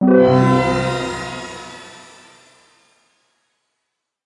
D64 Samplepack - FX POWERUP 37
Synthesized energy sound. could be used for magical or scifi special effects in a video game.
effect, energy, fx, game, magic, magical, power, sci-fi, sfx, synth, synthesis, synthesizer, videogame